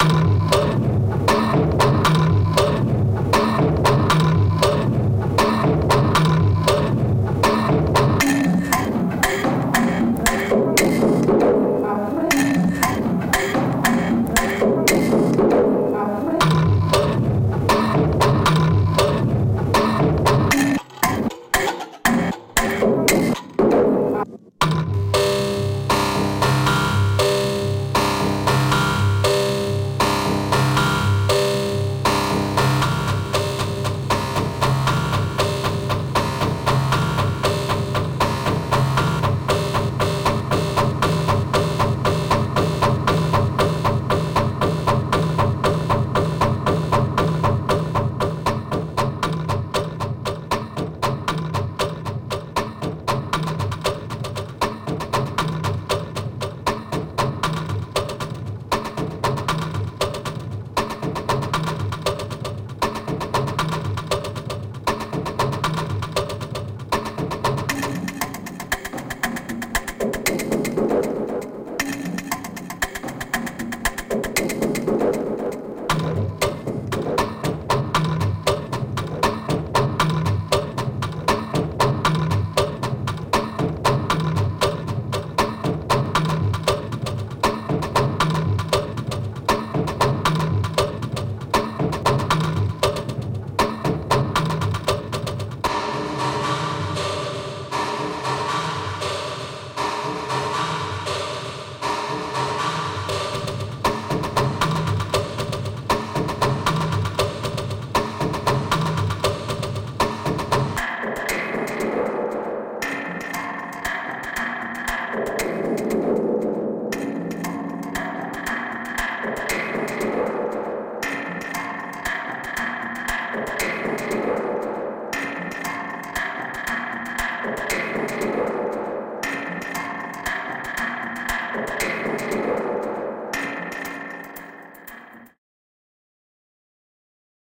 Tokyo - Drum Loop 2
Made from recordings from a drum museum in Tokyo. It's two one bar loops with effects thrown at it. Done in 2009 so I've idea what I did but has resonators, delays, distortion etc and lots of compression. Starts off relatively straight forward, gets dubby. Recorded on a Zoom H4, mixed in Ableton Live. 117bpm